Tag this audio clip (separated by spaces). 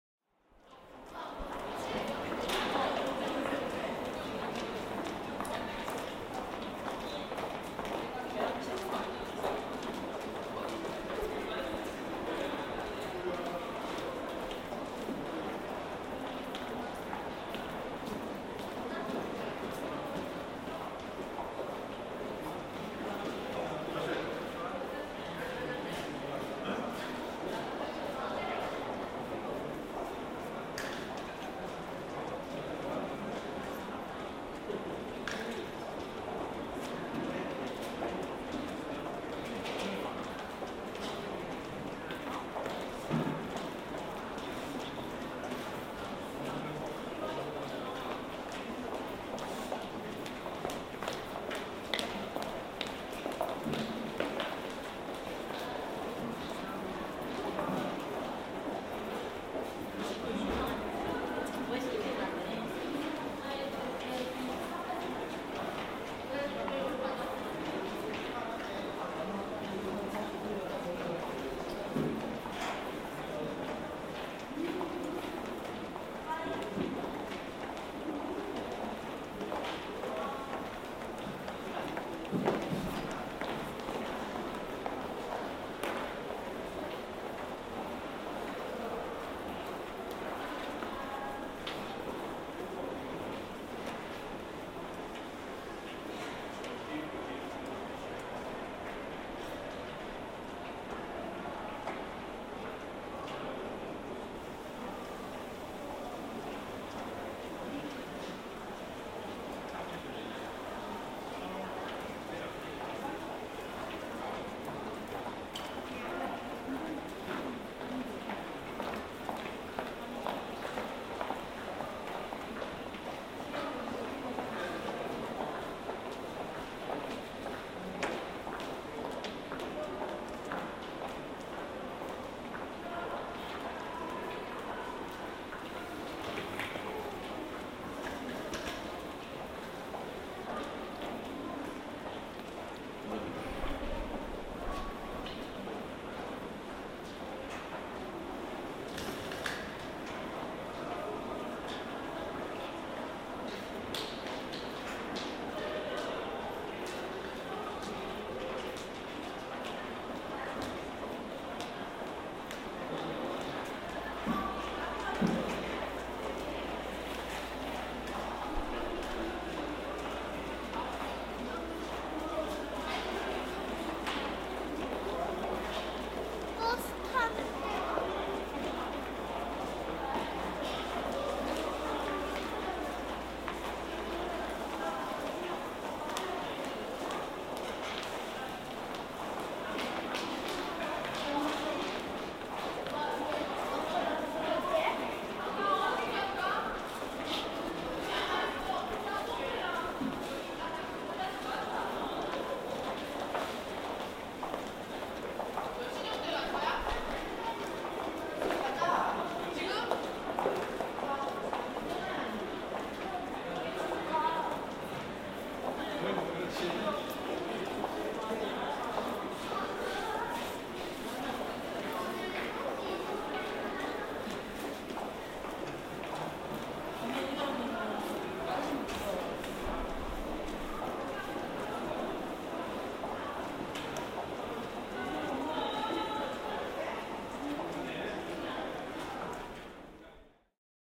ambient foley hallway field-recording footsteps sound-effect